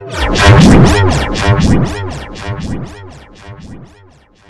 bass fx echo vib phase 2
Ideal for making house music
Created with audacity and a bunch of plugins
fx, house, ping, quality